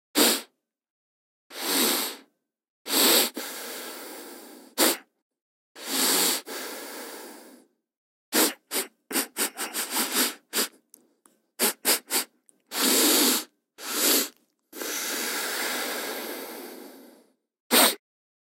Human inhaling through nose multiple times
breath, sniff